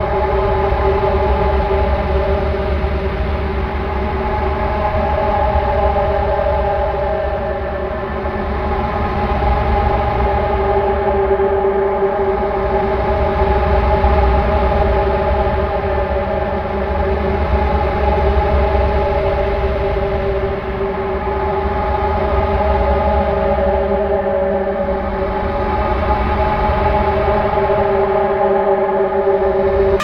Horror Ambiance
ambiance, horror, horror-effects, horror-fx